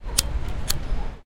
Sound of the flint of a lighter.